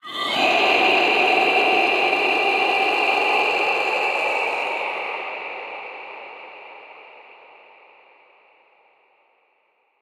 4. of 4 Monster Screams (Dry and with Reverb)
Monster Scream 4 WET